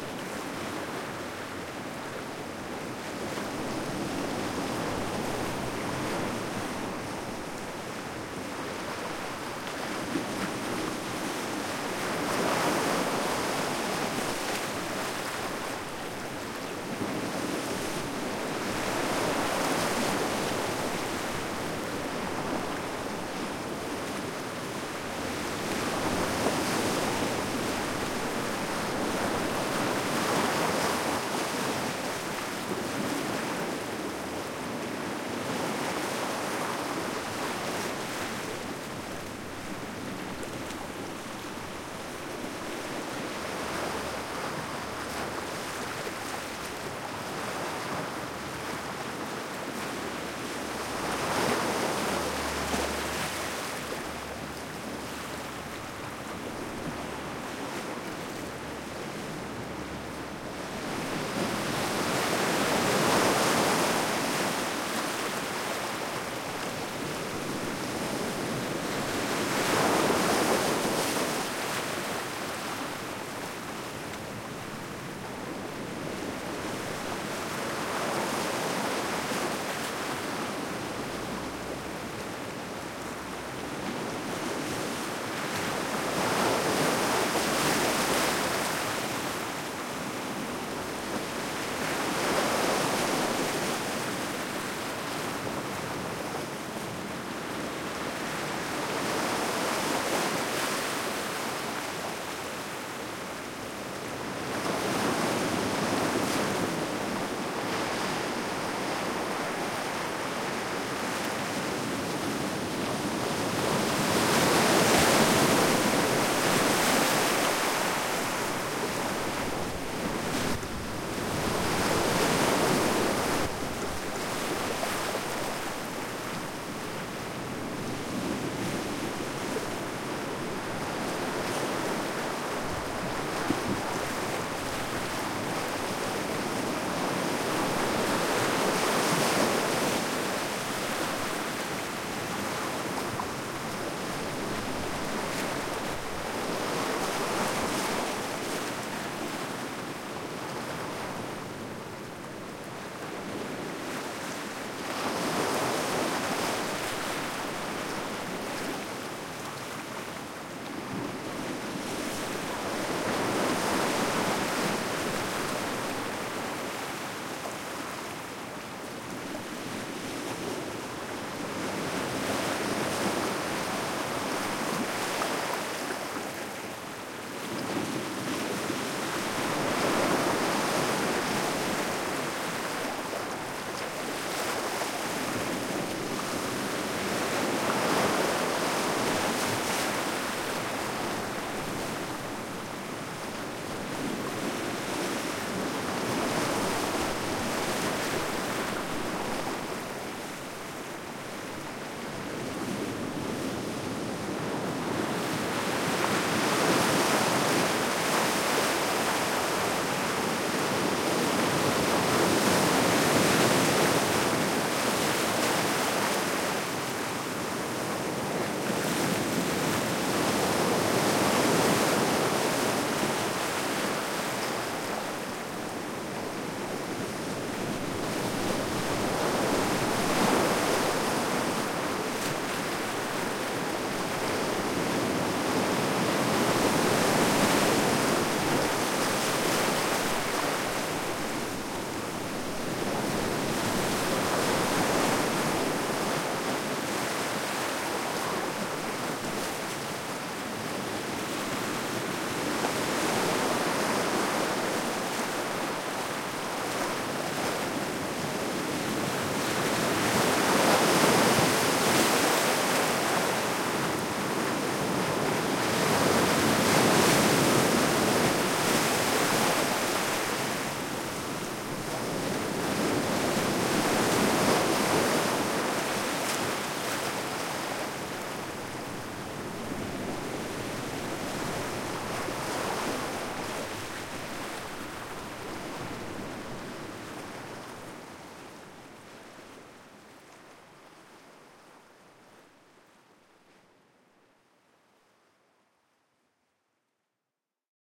Big waves hit land
I went to our eastern part of Oskarshmn to record the sound of the Baltic Sea waves. With my F4 and 2 CM3 with
windshields. That sounds really nice :)
microphones 2 CM3 Line audio
Rycote Stereo Baby Ball´s as windshield
Software Wavelab
nature, ocean, water, surf, coastal, wind, field-recording, F4, zoom, stereo, coast, beach, wave, relax, autumn, shore, sea, seaside, line-audio, sea-shore, waves